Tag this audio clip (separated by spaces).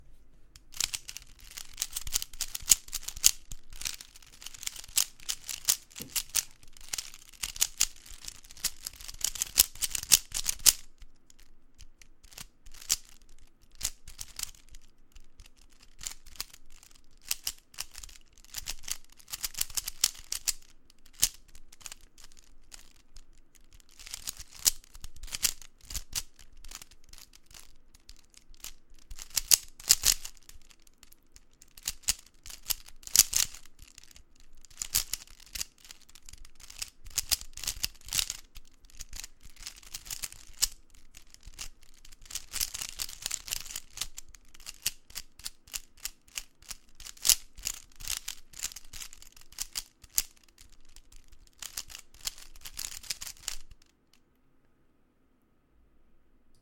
Rubiks Rubikscube Cube Crunch Click Puzzel